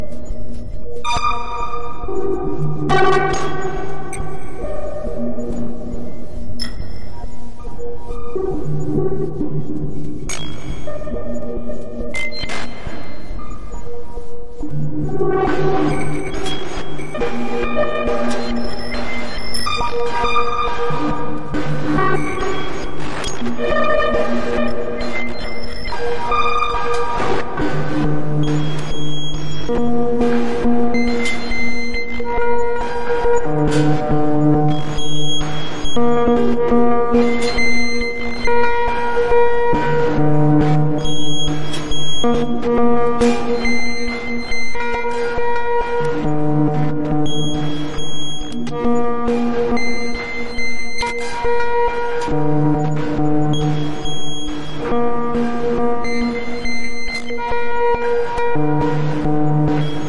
Cloudlab-200t-V1.2 for Reaktor-6 is a software emulation of the Buchla-200-and-200e-modular-system.
6, Runs